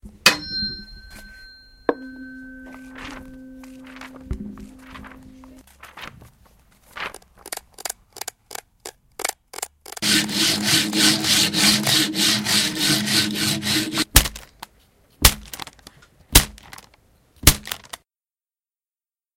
Soundscape GEMSEtoy Eloise
After listening to mySounds from our partner school Eloise made a selection to create a Soundscape